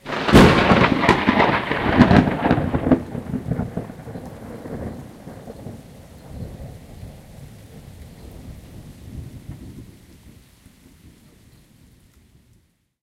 Dramatic overhead thunderclap
Hasty recording, so a little over on level but pretty dramatic thunderclap captured in urban area. Some rain evident.
Recorded using built-in mics of Zoom H2N